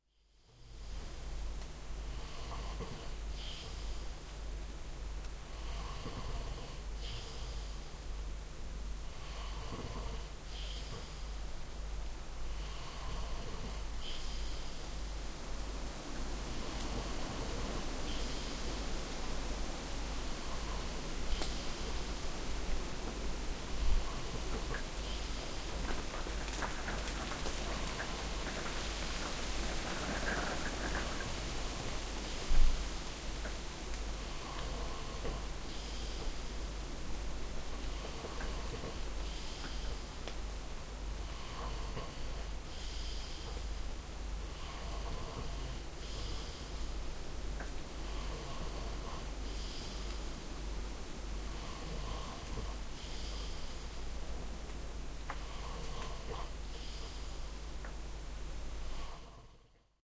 I'm sleeping in a cottage in the woods of "de Veluwe" in the Netherlands. The wind is moving the trees that due to the rain became wet. Drips of water fall out of the trees on the roof of the cottage. You also hear the rain on the roof of the cottage which makes a different sound because the raindrops are smaller as the drops falling out of the trees.